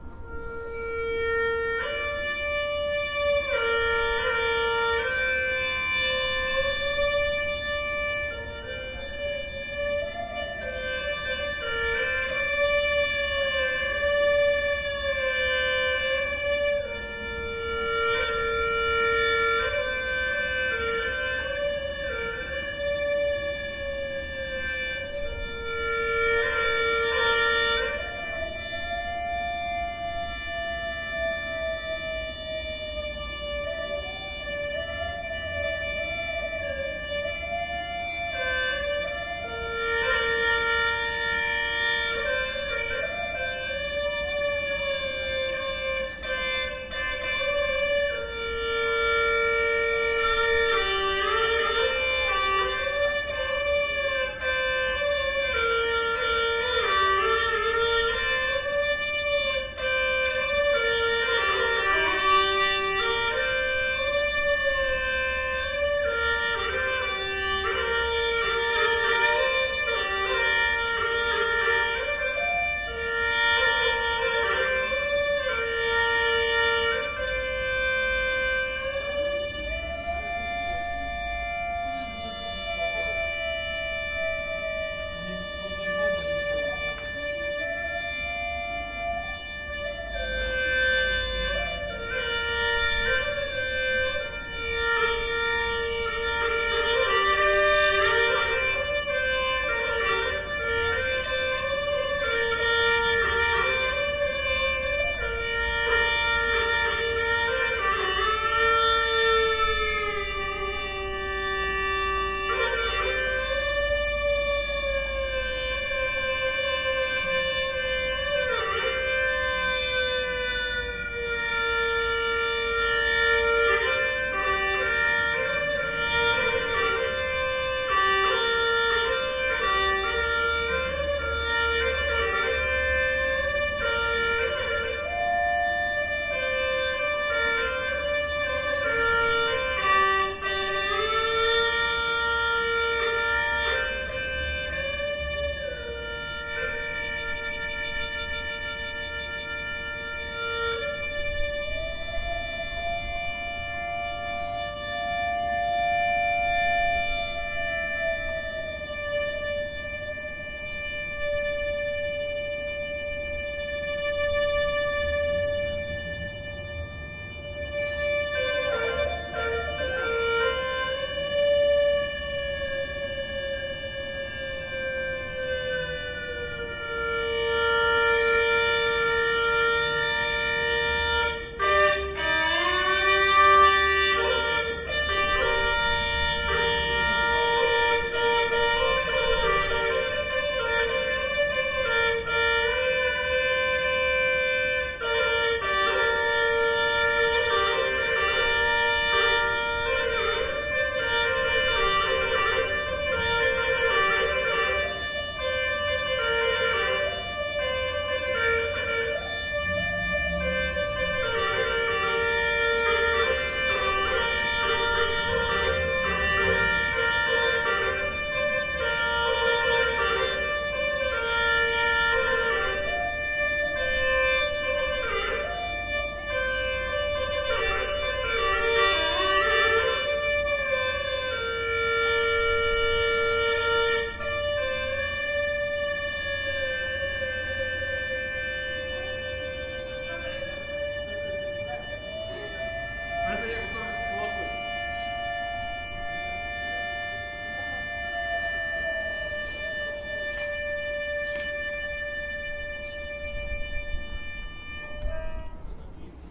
Street Hulusi short
Musician plays hulusi improvisation under the city gate.
Recorded 27-05-2013
XY-stereo, Tascam DR-40, deadcat.
The hulusi (traditional: 葫蘆絲; simplified: 葫芦丝; pinyin: húlúsī) or cucurbit flute is a free reed wind instrument from China. Hulusi on wikipedia
ambiance, ambience, ambient, atmo, atmosphere, china, city, field-recording, flute, folk, hulusi, improvisation, live, music, musical-instrument, musician, noise, Omsk, open-air, people, soundscape, street